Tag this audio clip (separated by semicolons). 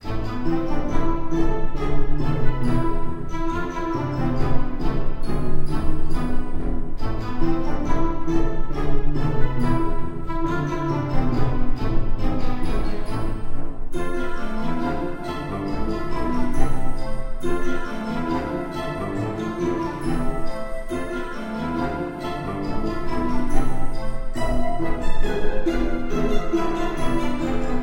cartoony; classical; gamemusic